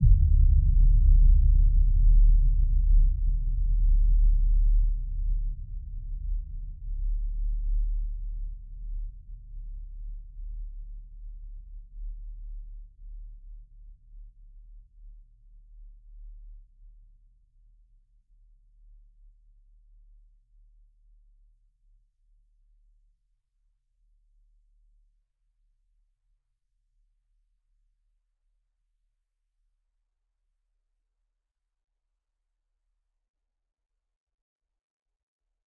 Trailer Sub
awesome, thrilling, impact, trailer, raiser, sub, movie, budget, deep, cinema, swoosh, action, hit, low, orchestral, sound, low-budget, film, epic, free, design, suspense, scary, whoosh, horror, cinematic, dope, mind-blowing